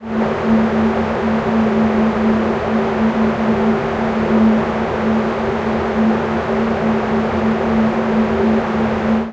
pad gas01
a deep pad in best GAS manner.